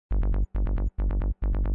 PsyTrance Bassline in G0 137bpm
Bpm is 137 and notes are G0. Bass uses distorsion, delay, reverb and EQ.
bassline, psytance, bass, loop, electronic, synth, G0, music, 137